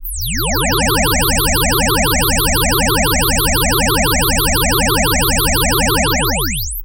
I have finally started building a saucer-shaped UFO. Unlike other designers, I've build the sound it should make first, using harmonics derived from the Mayan calender and the distances between the pyramids and the Angkor temples (well, I've still got to work out the mathematics, but I'm sure I'll find a way to make it all match). Anyway, listening to these sounds for too long will get you abducted by aliens in the near future. Or you'll wake up in the middle of the night, running circles in the corn or doing weird things to cattle. This is the sound of my UFO neutralizing gravity waves around it, so it's hovering around like a balloon in the wind.
flying-saucer, aliens, abduction, conspiracy, eery, ufo, mind-control, alien, eerie